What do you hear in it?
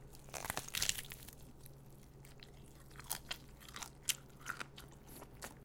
Bite into flesh
flesh, gross, sounds, tearing